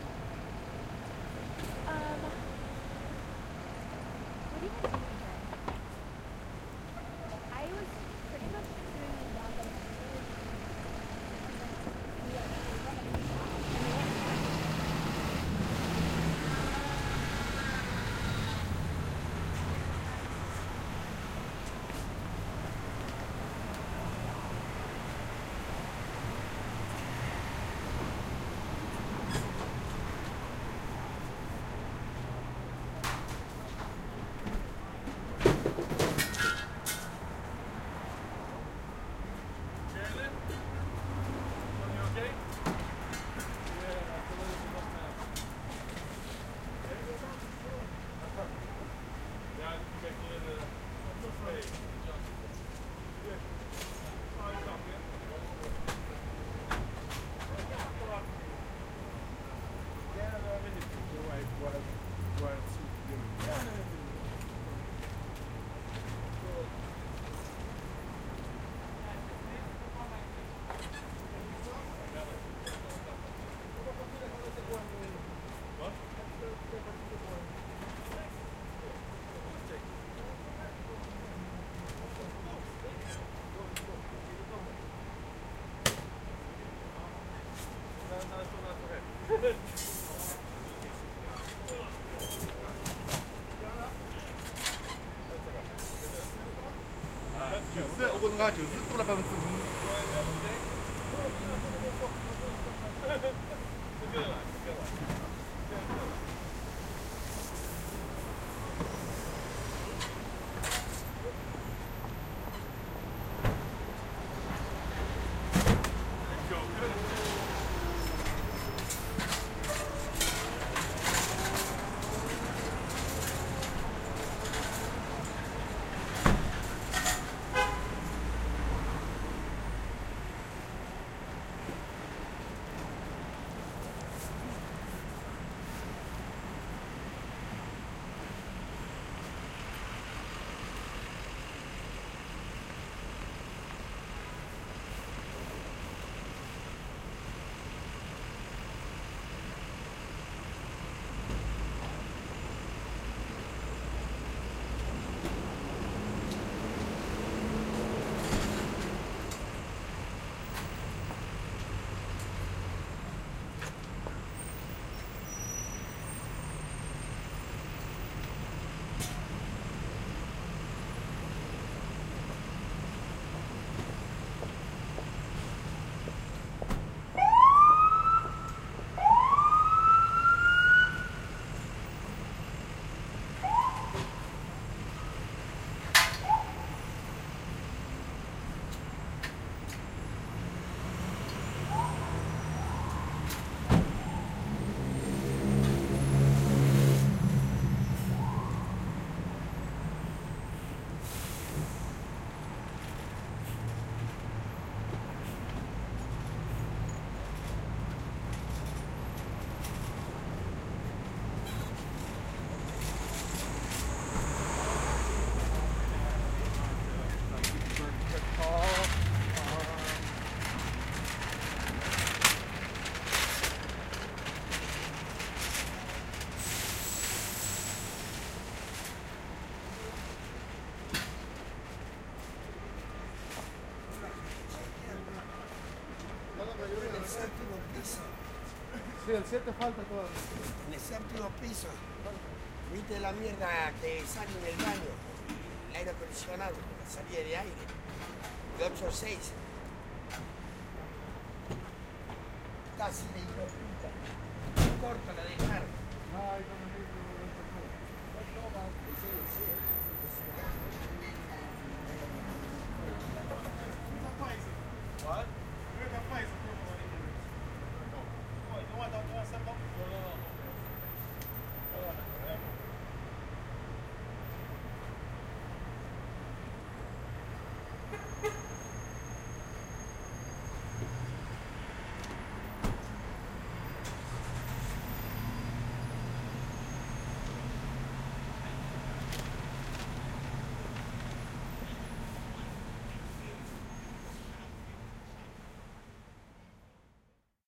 The general ambiance of Lexington Avenue in Manhattan, NYC. Sound of cars, workers, and people walking by.